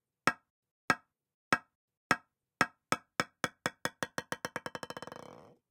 Bouncing Golf Ball
This is a close up recording of a golf ball being dropped on a thick marble table.